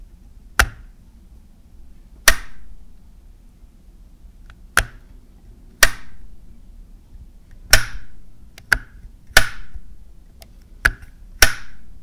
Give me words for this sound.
Turning a wall light switch on and off repeatedly
button, click, flip, lamp, light, light-switch, press, push, switch, switched, switches, switching, toggle, turn-off, turn-on